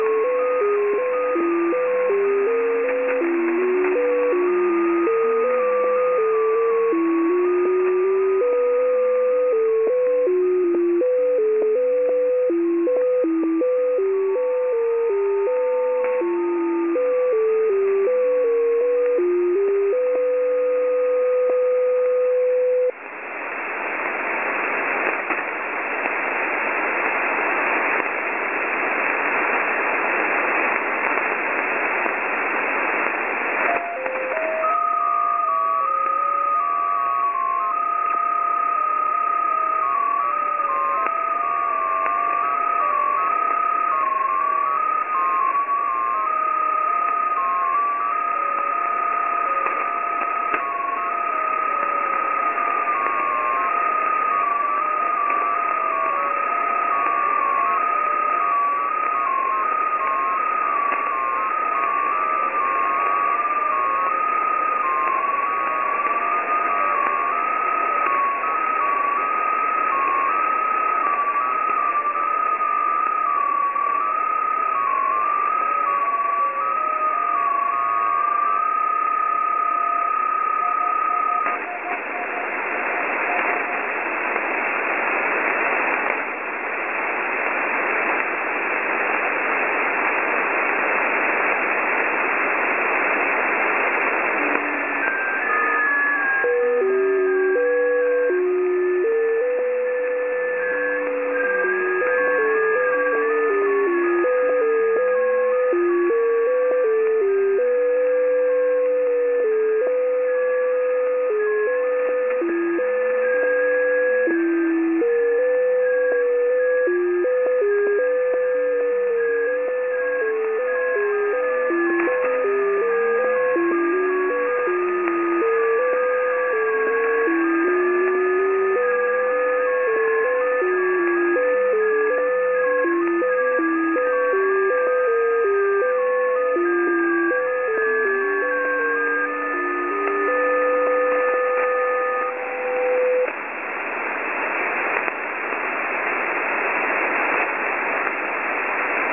14078.03 kHz LSB
Signals recorded at 14078.03 kHz, lower sideband.
signals, transmission